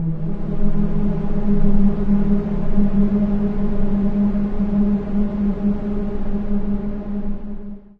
SteamPipe 4 Ambient Landscape E1
This sample is part of the "SteamPipe Multisample 4 Ambient Landscape"
sample pack. It is a multisample to import into your favourite samples.
An ambient pad sound, suitable for ambient soundsculptures. In the
sample pack there are 16 samples evenly spread across 5 octaves (C1
till C6). The note in the sample name (C, E or G#) does not indicate
the pitch of the sound but the key on my keyboard. The sound was
created with the SteamPipe V3 ensemble from the user library of Reaktor. After that normalising and fades were applied within Cubase SX & Wavelab.
ambient, atmosphere, pad, reaktor, multisample